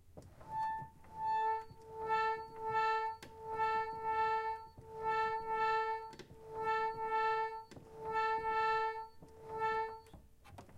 Pump Organ - Mid A
Recorded using a Zoom H4n and a Yamaha pump organ
a, a3, note, organ, pump, reed